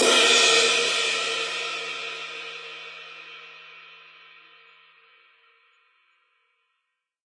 Multisampled 20 inch Istanbul pre-split (before they became Istanbul AGOP and Istanbul Mehmet) ride cymbal sampled using stereo PZM overhead mics. The bow and wash samples are meant to be layered to provide different velocity strokes.